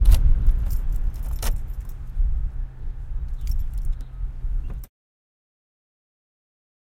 keys - car, unlocking door
Car keys, unlocking door.
car, keys, unlocking